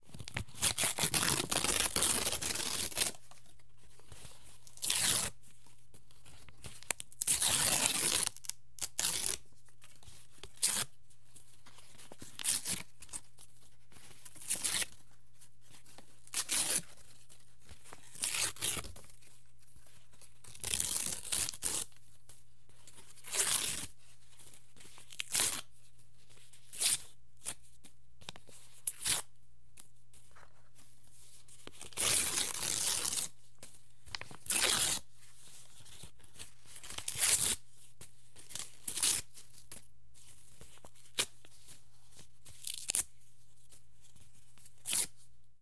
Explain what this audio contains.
Ripping White Paper 01
Someone ripping white paper.
Paper, Rip, Ripping, White